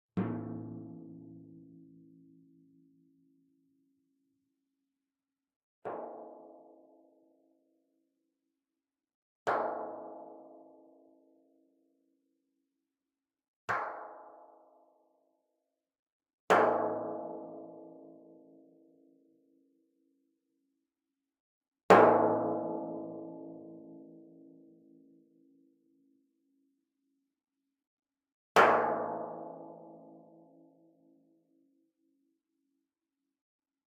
timpano, 71 cm diameter, tuned approximately to A.
played with a yarn mallet, on the very edge of the drum head.